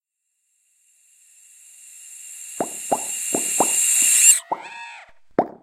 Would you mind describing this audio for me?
PortalShutdown Ending
Part of a series of portal sound effects created for a radio theater fantasy series. This is the sound added to the continuous portal sound to create an end to that loop. The continuous sound is in my "backgrounds" pack.